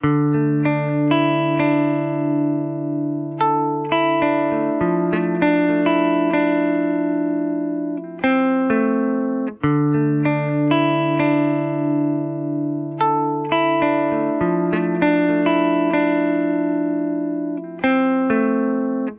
Dm F arp100 bpm
electric, guitar